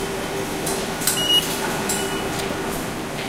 One of the many field-recordings I made in and around train (metro) stations, on the platforms, and in moving trains, around Tokyo and Chiba prefectures.
October 2016.
Please browse this pack to listen to more recordings.